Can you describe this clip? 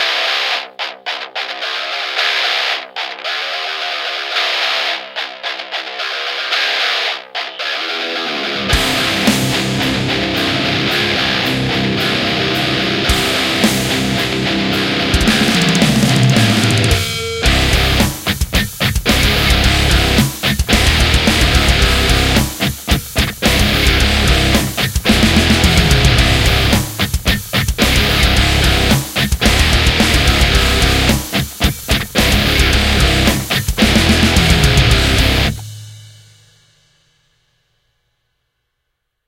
Metal Intro
Hard-Rock, Groovy, Rock, Music, Intro, Beat, Riff, Heavy, Electric-Guitar, Drums, Guitar, Distorted, Clip, Metal, Hard